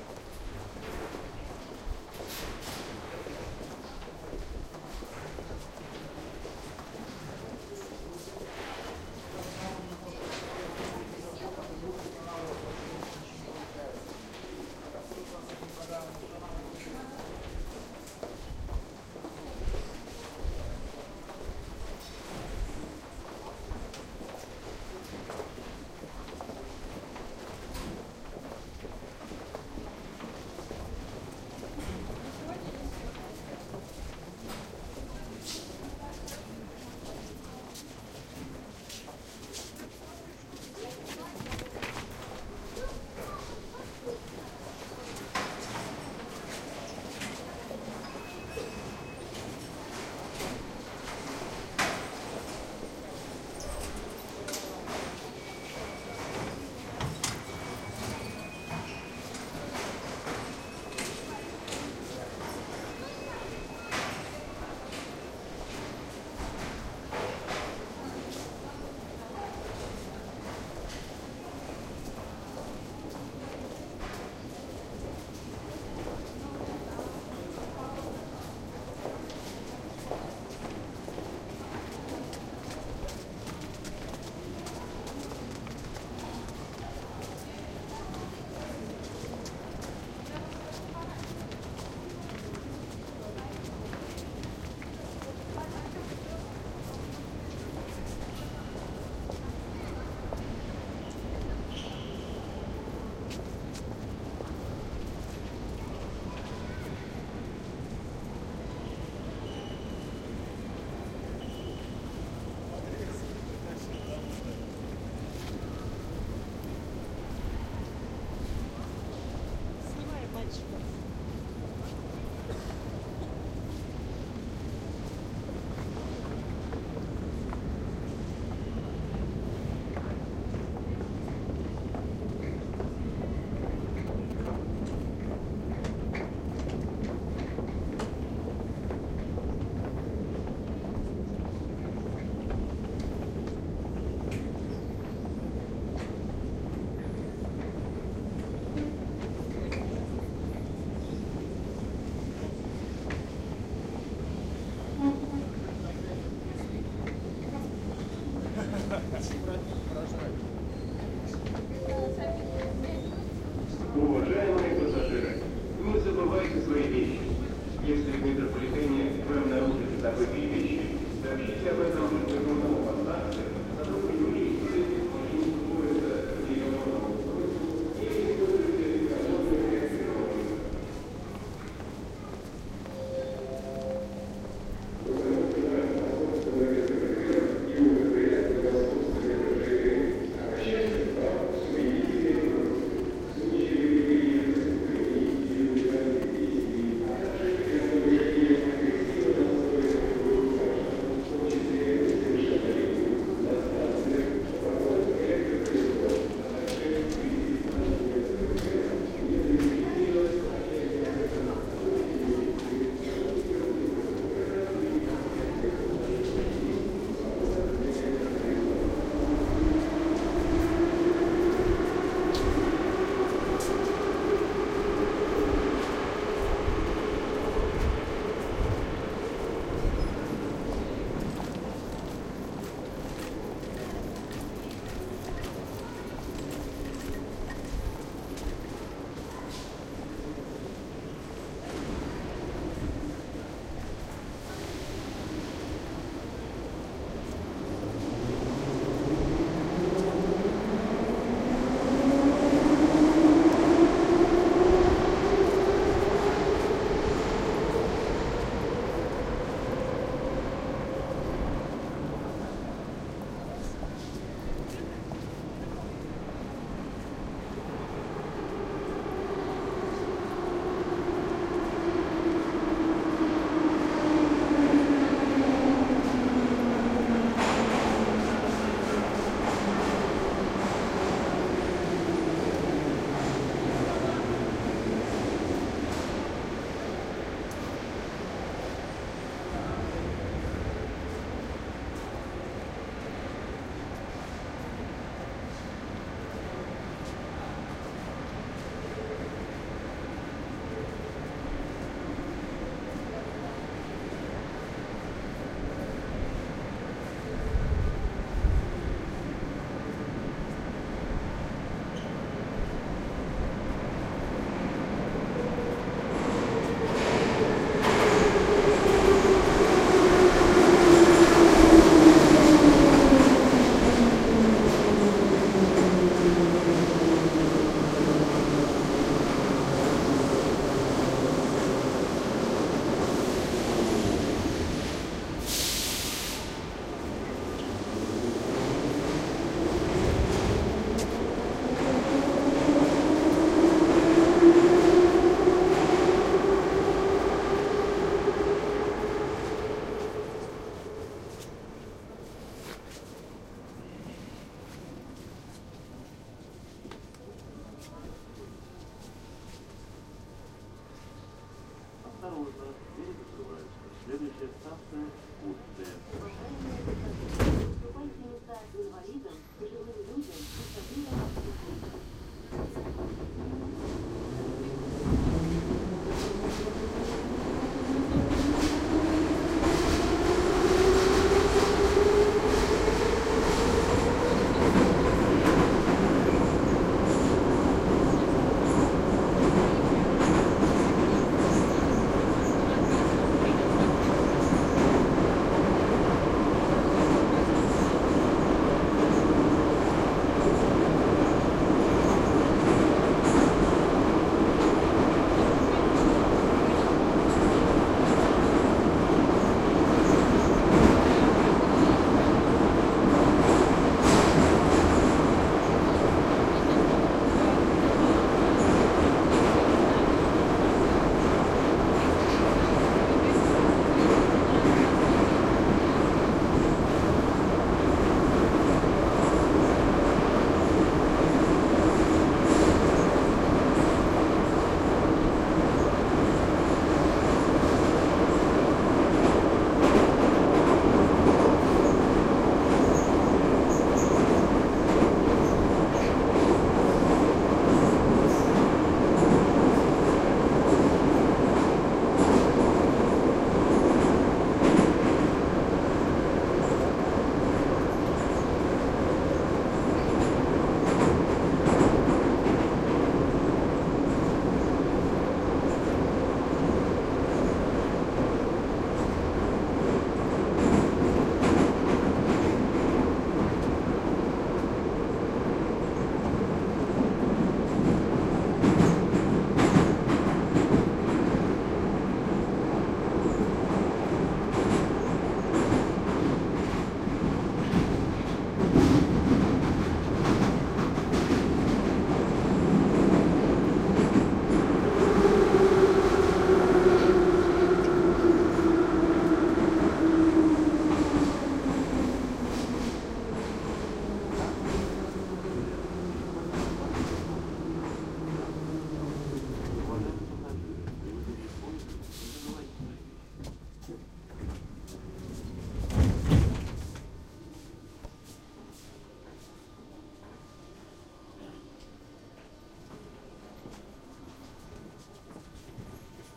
Moscow down to the subway
Moscow, going under[ground], 17-Aug-2009
metro, moscow, subway